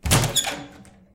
Opening a wooden door with a squeaky metal handle. Natural indoors reverberation.